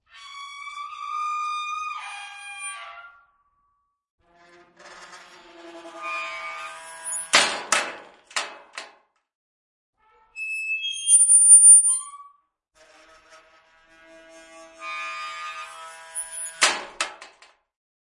Recording of squeaky metal, lattice gates. The phonogram is processed after recording. Mainly, removing background noise.
Enjoy it. Please, share links to your work where
this sound was used.
Note: audio quality is always better when downloaded.